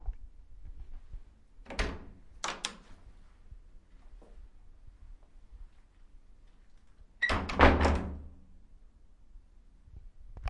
Door open and close
Opening a door and closing it